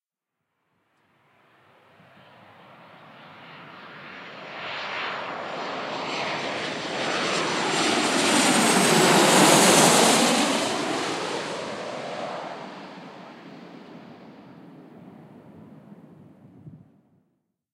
Plane Landing 07
Recorded at Birmingham Airport on a very windy day.